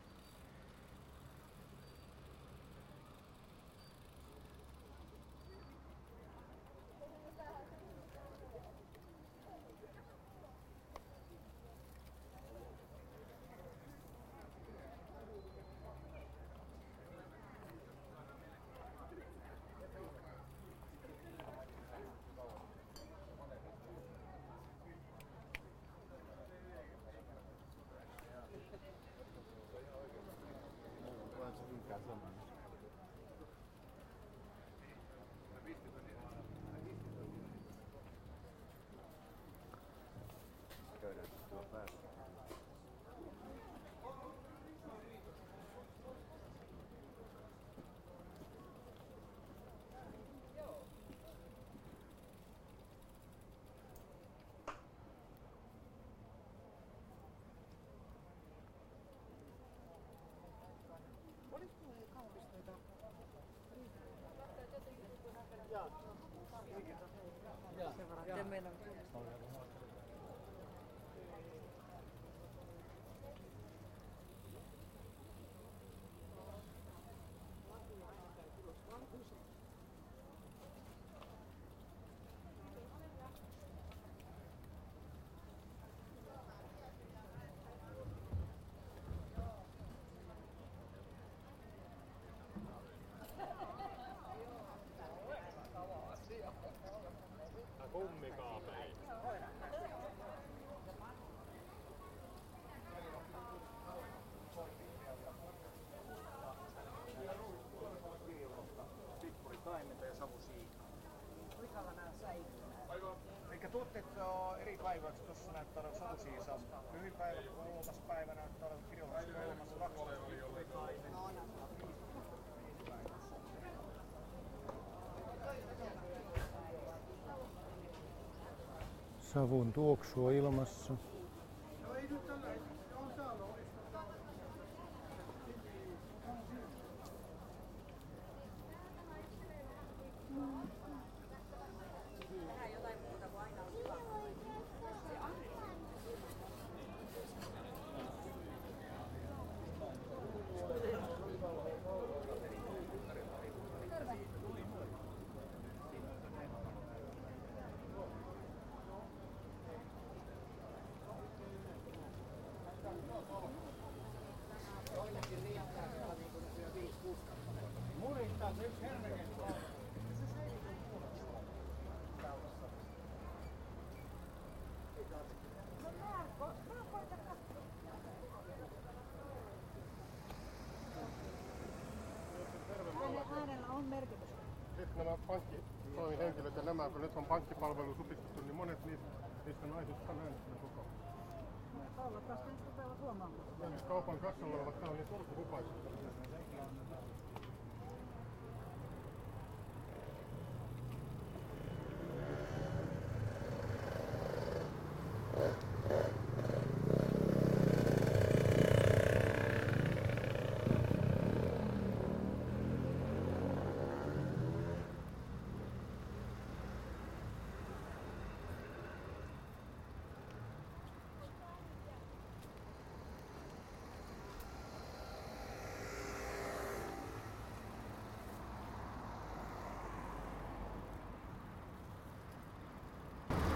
002 The sounds of the city
Bicycle to the city's traffic
cars, street